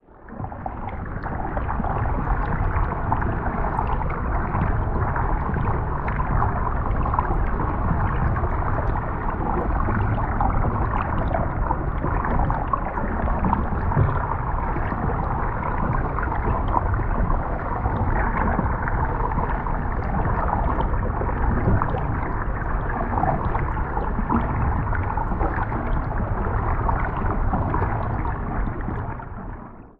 12 Stream, Muddy Current, Dark, Trickling, Drips, Flowing, Underwater, Dive Deep 2 Freebie
pool, scuba, diver, deep, water, ocean, underwater, ambience, sea